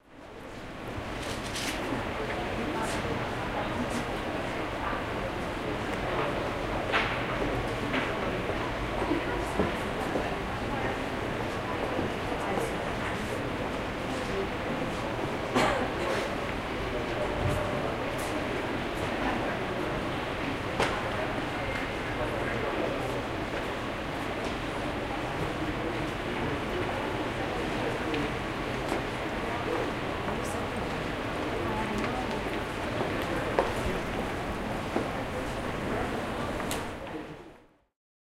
2 08 Br Lib amb 4
The general ambience of the British Library in London. Recorded on minidisc February 2008. This one is in the main entrance or concourse and has a very open ambience due to the high ceilings and solid floors.
steps, footsteps, ambience, british-library, voice, atmosphere, library, field-recording